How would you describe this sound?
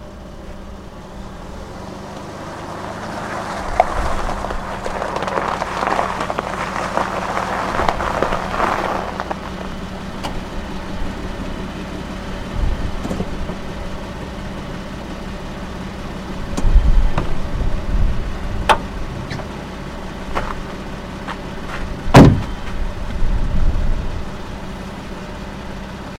Car drives up on a gravel road, stops and door opens, person steps out